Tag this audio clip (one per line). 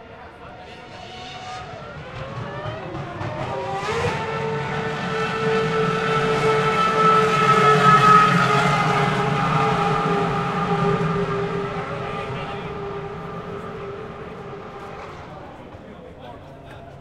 accelerating
car
engine
f1
field-recording
gear
racing
vroom